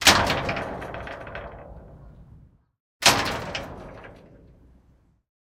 Light Metal Gate Close
A metal gate falling shut.
close, closing, door, gate, metal, metallic, rattle, rattling, shut, shutting, zoom-h2